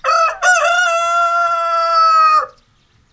FARM ROOSTER COCKADOODLEDOO
chicken, environmental, farm, hen, house, rooster, roosters, sounds